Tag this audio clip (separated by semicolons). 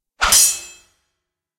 blade; sword